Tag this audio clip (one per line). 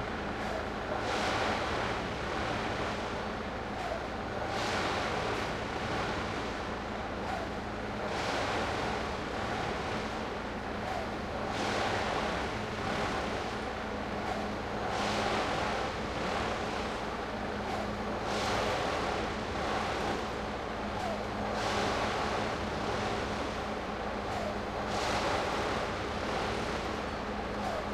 agressive
hard
industrial
massive